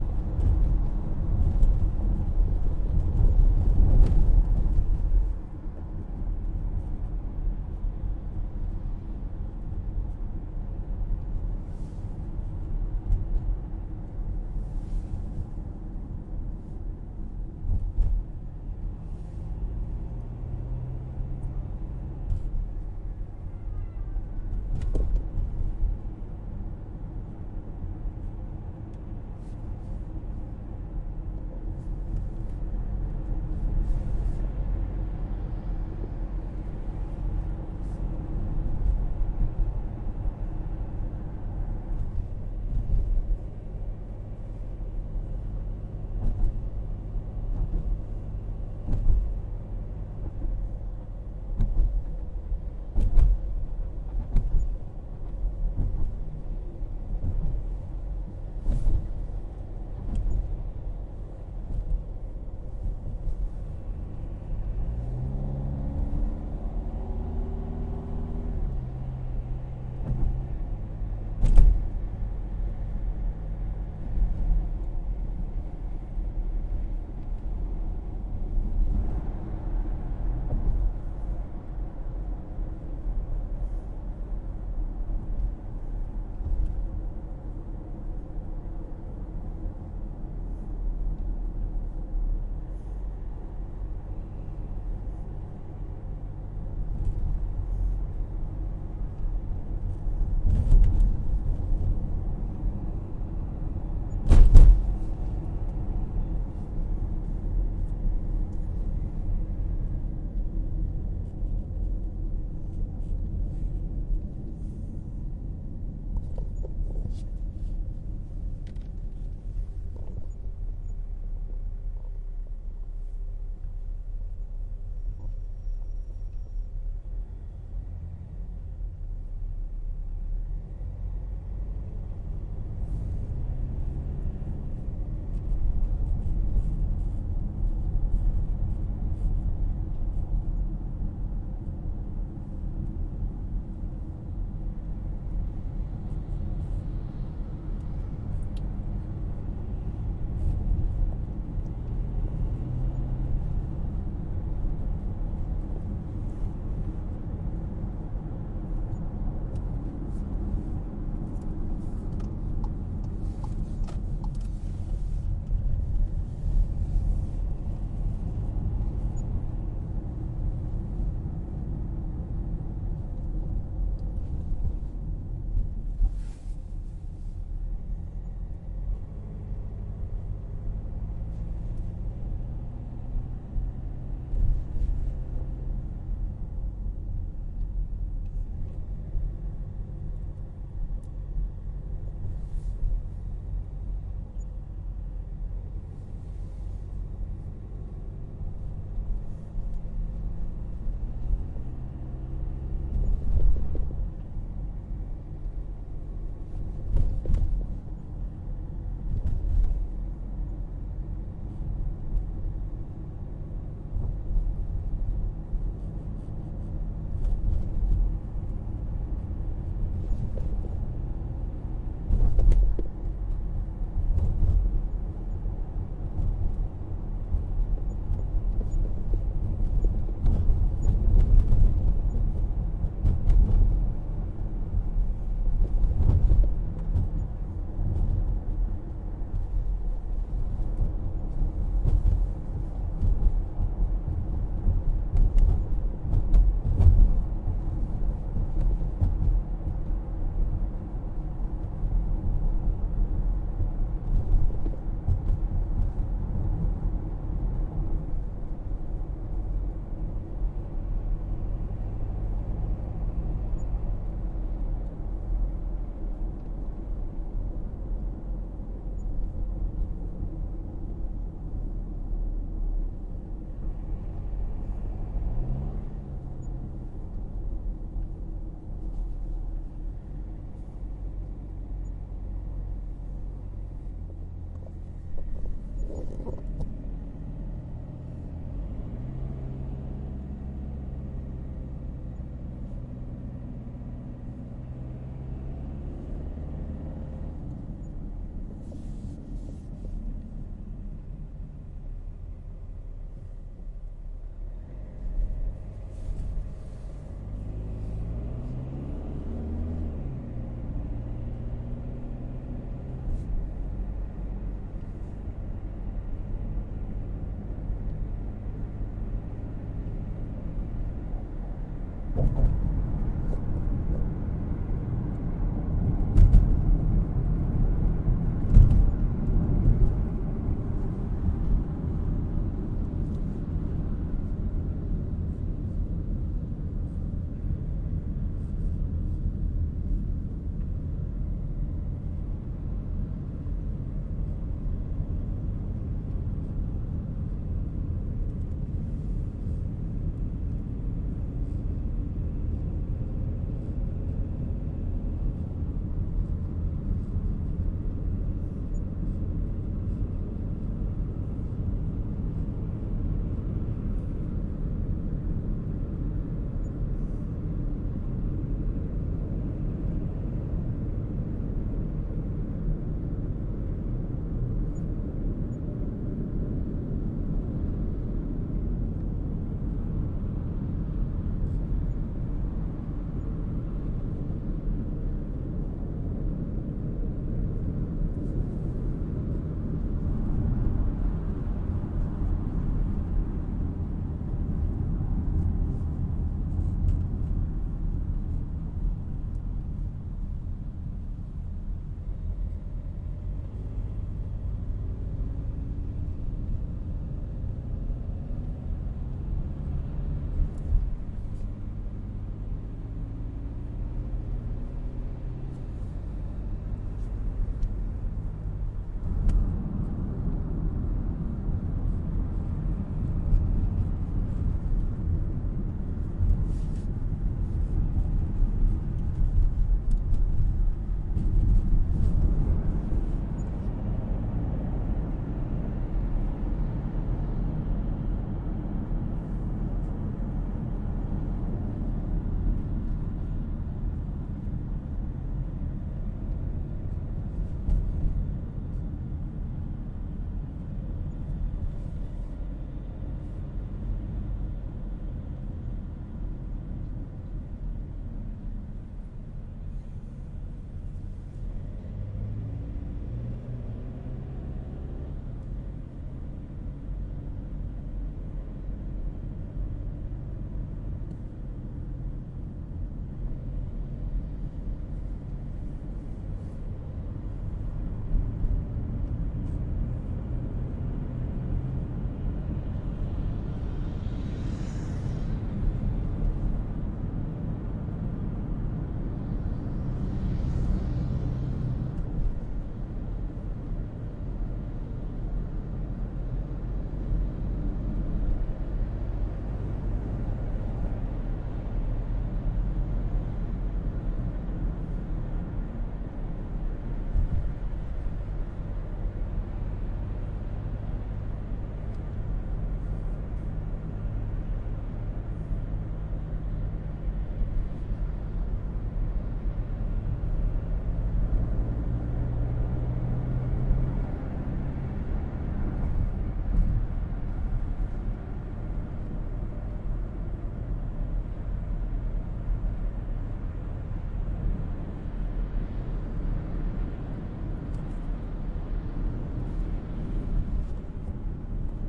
interior of mooving car engine
Sound of mooving car engine recorded by the driver